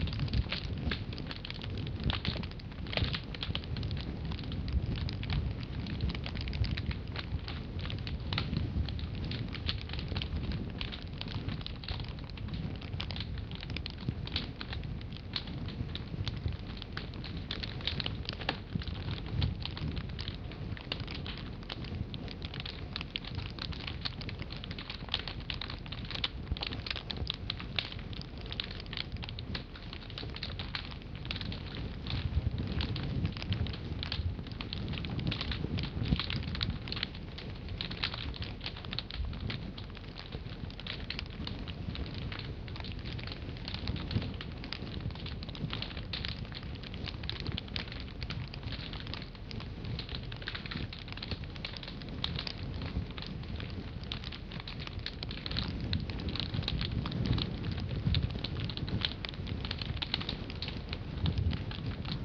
fire ambience background
This was made using an old cotton bag for the rumble of flames and then two different types of plastic wrapping crinkled up. Then it was put through audacity changing the speed and pitch separately for each track, then mixed and rendered. I am quite pleased how this turned out.